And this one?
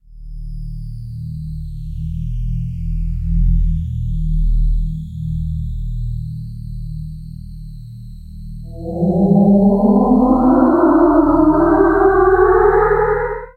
msft vs goog v12
Sonified stock prices of Microsoft competing with Google. Algorithmic composition / sound design sketch. Ominous. Microsoft is the low frequency and Google the higher. This time, daily trading volumes have been used to control signal amplitude
moan, ominous, spectral, sonification, csound